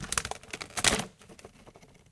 Rummaging through objects